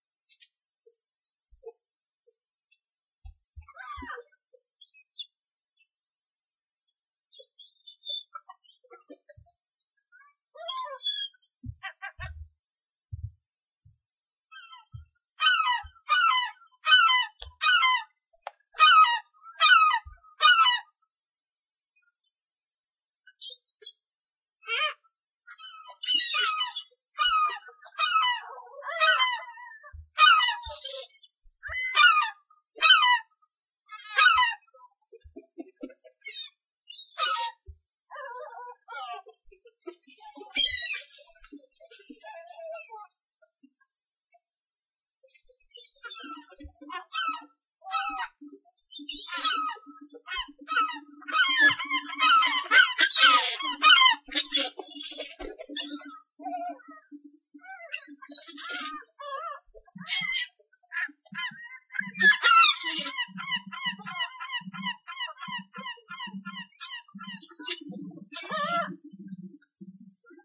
Seagulls recorded at Fort Taber, New Bedford, MA